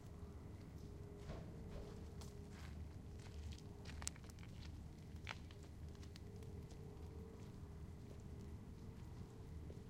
Walking by in the Rain Short
A man walks by in a California light drizzle. The microphone was placed very close to the ground and picks up his muffled, saturated footsteps. I crunches on a few fallen leaves as he passes.
aip09, ambient, drizzle, escondido-village, footsteps, muffled, night, patter, pitter, rain, splash, walking, water